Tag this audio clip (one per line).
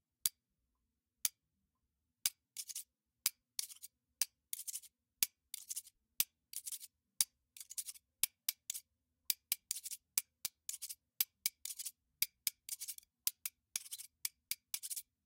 Hits
Loop
Hit
Knife
Kitchen
Fork
Domestic
Percussion
Spoon
Wood
Pan
Metal
FX
Metallic
Saucepan